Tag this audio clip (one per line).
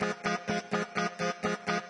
drone
funeral-dirge
loop
synth